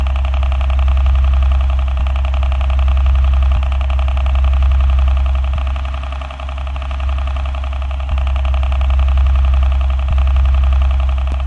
This one was actually recorded with a noisy, clunking fan.